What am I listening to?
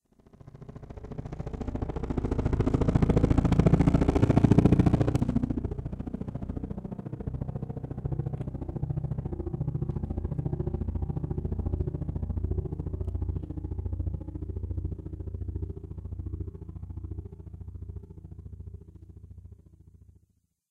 SRS Designed Helicopter Passby
Made by using a recording of a plastic object vibrating on a floor tom after playing back 80Hz out of a subwoofer. I just processed it with Vari-Fi, Waves Doubler and Frequency Shifter, it had a nice natural doppler sound to it in the recording as the object slid down the floor tom.